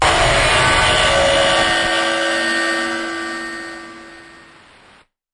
DrumPack002 Overblown Glitched Splash (0.67 Velocity)
How were these noises made?
FL Studio 21
Track BPM: 160
Instruments: FPC
Drumset / Preset: Jayce Lewis Direct
Effects Channel:
• Effect 1: Gorgon
◦ Preset: Alumnium Octopus (Unchanged)
◦ Mix Level: 100%
• Effect 2: Kombinat_Dva
◦ Preset: Rage on the Kick (Unchanged)
◦ Mix Level: 43%
• Effect 3: Kombinat_Dva
◦ Preset: Loop Warmer (Unchanged)
◦ Mix Level: 85%
Master Channel:
• Effect 1: Maximus
◦ Preset: NY Compression (Unchanged)
◦ Mix Level: 100%
• Effect 2: Fruity Limiter
◦ Preset: Default (Unchanged)
◦ Mix Level: 100%
What is this?
A single 8th note hit of various drums and cymbals. I added a slew of effects to give a particular ringing tone that accompanies that blown-out speaker sound aesthetic that each sound has.
Additionally, I have recorded the notes at various velocities as well. These are indicated on the track name.
As always, I hope you enjoy this and I’d love to see anything that you may make with it.
Thank you,
Hew
Distorted, Distorted-Drum-Hit, Distorted-Drums, Distorted-One-Shot, Distorted-Single-Hit, Distorted-Splash-Cymbal, Distorted-Splash-Cymbal-One-Shot, Distorted-Splash-Cymbal-Single-Hit, One-Shot, Overblown-Splash-Cymbal, Single-Hit, Splash, Splash-Cymbal, Splash-Cymbal-One-Shot, Splash-Cymbal-Single-Hit